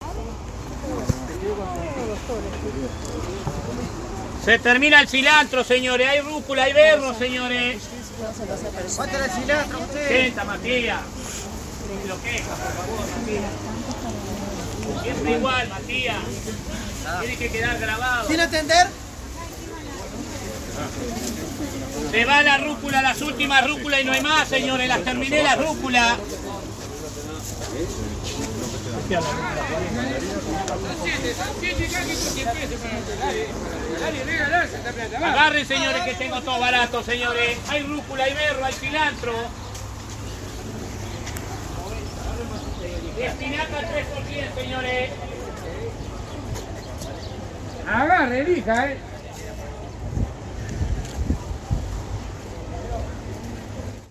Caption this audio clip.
Market in Montevideo
A short walk in a market place in Montevideo, Uruguay
simple recording device: Samsung Note 10 mic used
not high quality